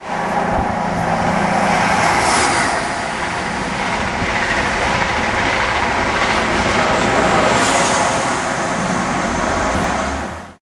Just another simple recording of an HST whizzing past me at about 100MPH accelerating to 125MPH however